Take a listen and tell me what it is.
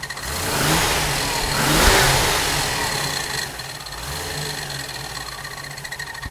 worn engine revving
Worn out Range Rover V8 engine revving. Recorded with Zoom H1
Engine, engine-rattle, V8, worn-engine